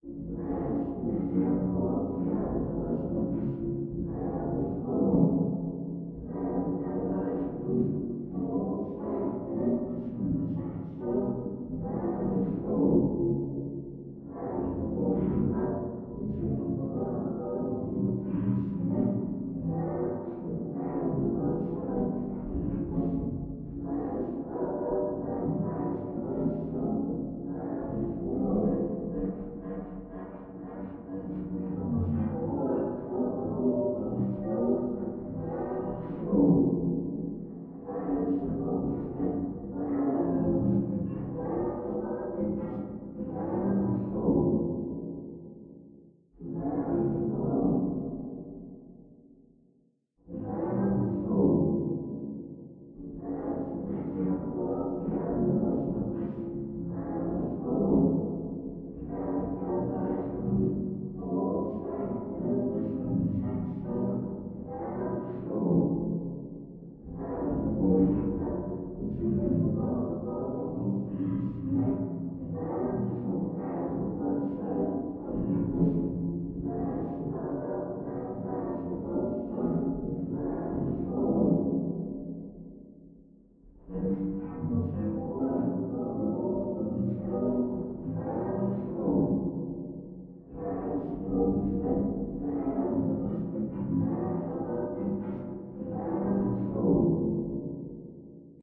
sea monster noises
reversed an audio file i had, added a low pass filter, some reverb, pitching-down etc etc. could fit as some kinda lovecraftian monster talking or communicating.
horror, monster, spooky